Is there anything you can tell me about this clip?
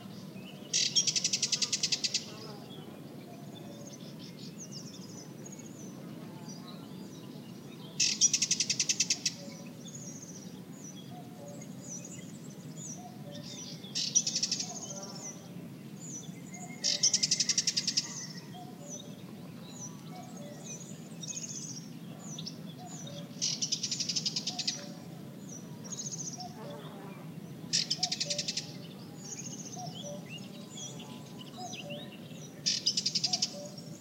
call of warbler, with cuckoo and other birds in BG. Sennheiser ME62 > iRiver H120 / una curruca y otros pajaros al fondo